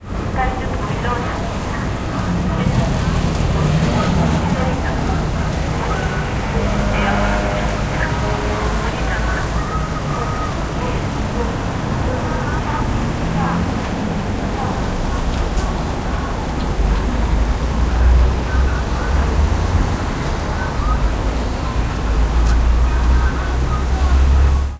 Traffic and a voice from a speaker in the street.
20120215